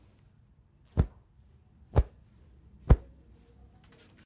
Hit Punch Golpes fuertes
Hitting lots of clothes